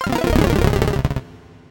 Game Sounds 1
You may use these sounds freely if
you think they're usefull.
(they are very easy to make in nanostudio)
I edited the mixdown afterwards with oceanaudio.
33 sounds (* 2)
2 Packs the same sounds (33 Wavs) but with another Eden Synth
19-02-2014
game, effects, effect, sound